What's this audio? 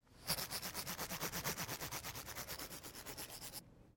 reversed spoon on spiral notebook
a, notebool, reversed, sound, spiral, spoon, tapping